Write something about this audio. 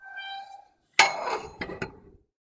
Backyard gate close
Closing the gate to the backyard. With a really nice squeak!